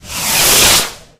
A small rocket passing close by. Very dry, no ambiance. Probably usable as a special effect or Foley.